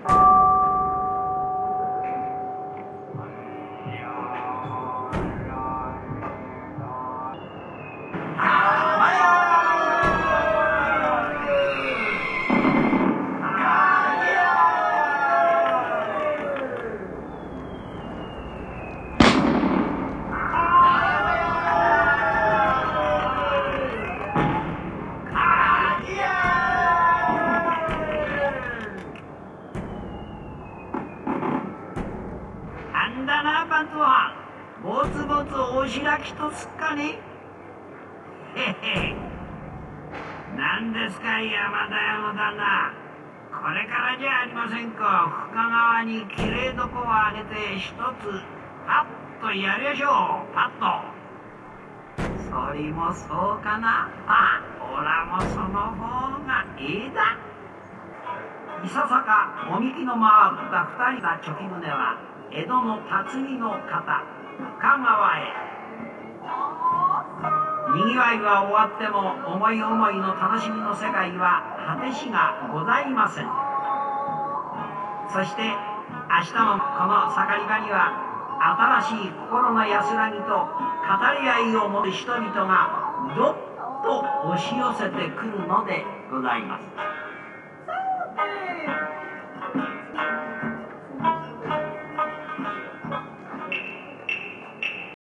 Recording of traditional play in Tokyo-Edo Museum, with ECM MS907 and MD MZN-710